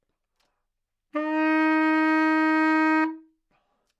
Part of the Good-sounds dataset of monophonic instrumental sounds.
instrument::sax_baritone
note::F#
octave::2
midi note::30
good-sounds-id::5301